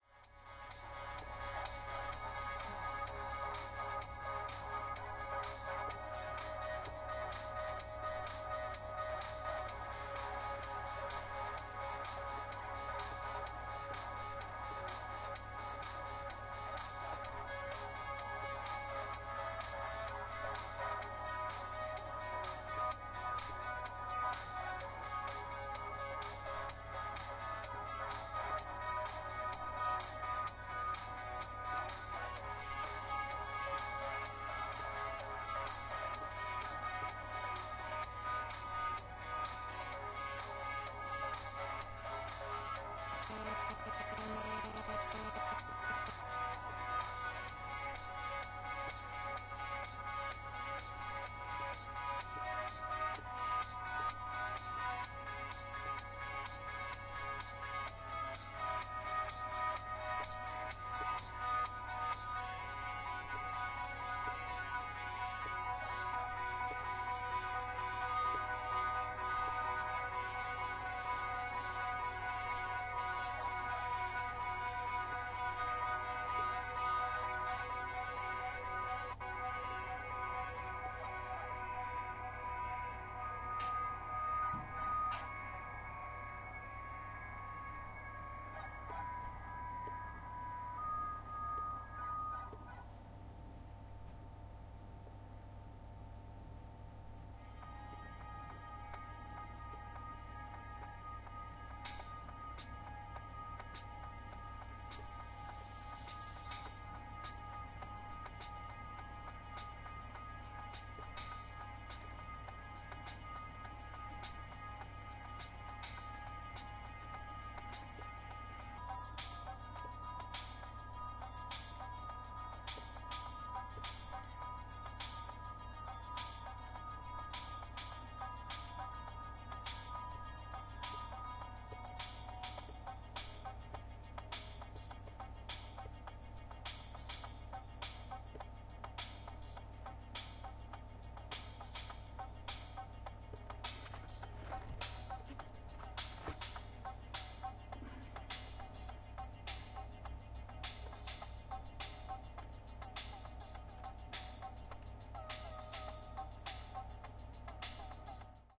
110814-szczesny in cab padborg
14.08.2011: fifteenth day of ethnographic research about truck drivers culture. Padborg in Denmark. Truck base (base of the logistic company).My truck drivers is playing in mahjong's on laptop, listening my friend music B Szczesny.
ambence, clicks, converter, denmark, field-recording, game, music, padborg, truck, truck-cab